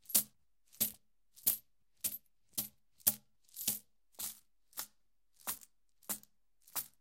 Small bag of coins dropped. A lot of sounds to choose from.
Rode ntg2 with zoomh4npro.
coin, gold, money